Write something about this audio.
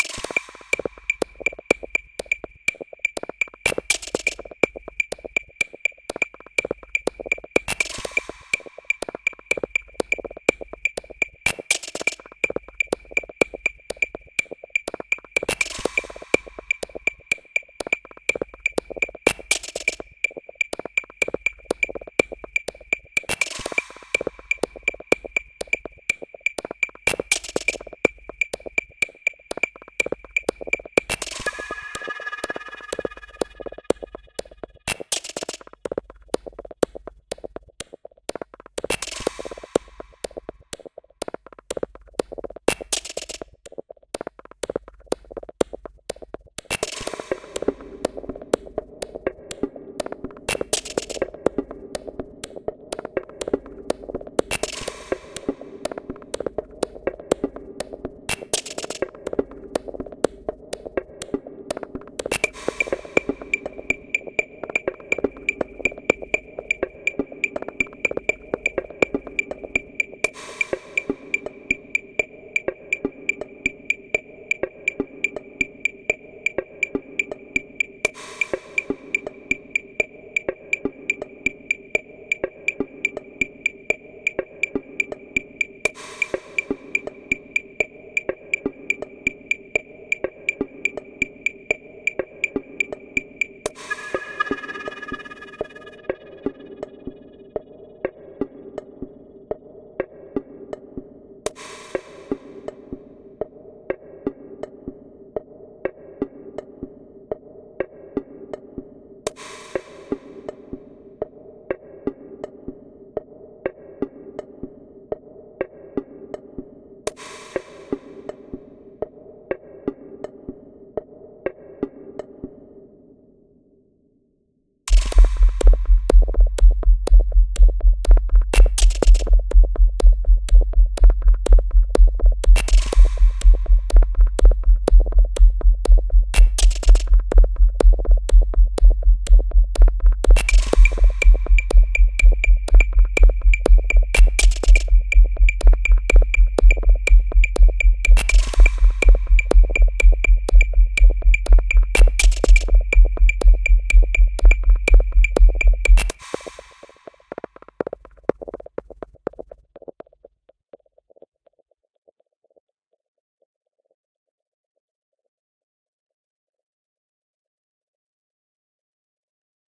untitled-123bpm-sequence-wet
Pieces of a track I never finished, without the beat. Atmospheric. 123 beats per minute.
This is how I sequenced it originally (without the beat).
sequence, techno, minimal, loop, 123bpm, tech